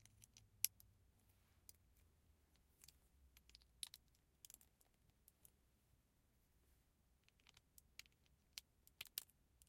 Playing with lego 02
Clicking in lego bricks, lego pieces